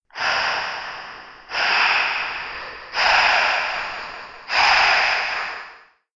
Ominous breathing
Ominous, creepy breathing. Processed with echo and reverb. Recorded with a CA desktop microphone
breathing, breath, ominous, breathe, dying, scary, creepy